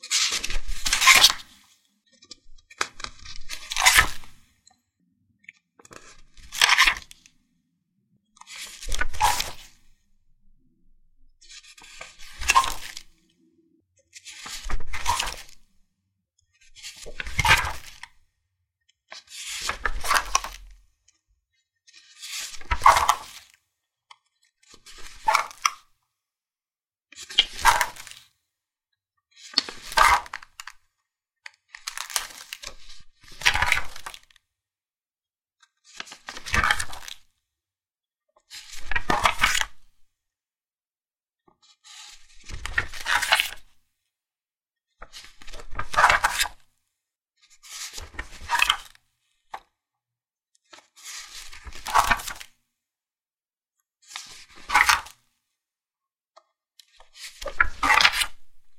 Flipping a book